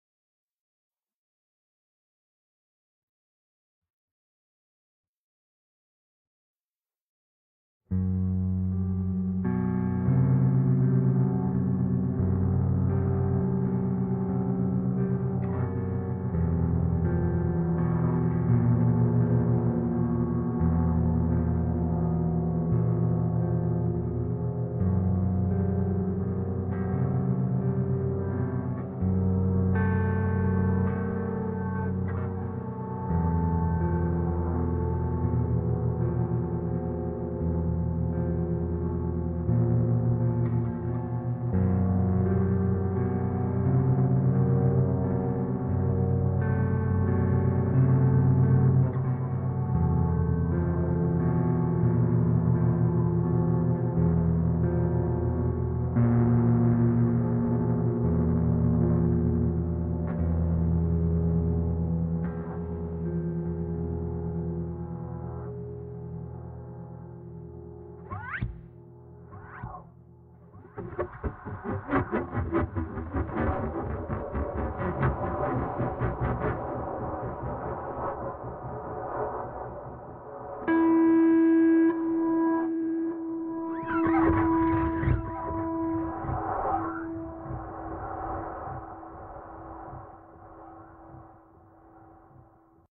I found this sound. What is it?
sinking in the earth by electric guitar...